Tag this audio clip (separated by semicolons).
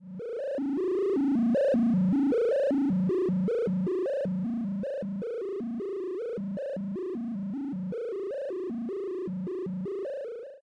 computer; school; original; old; melodic; cool; sample